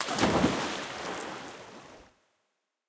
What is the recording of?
Swimming pool splash
'Bombing'/jumping into a small outdoor swimming pool. Recorded on internal mics of Zoom H2N
pool, splashing, spray, swimming, water